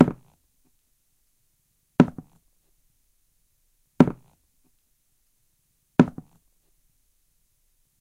walking on a floor slowly
shoes, walk, steps, footstep, footsteps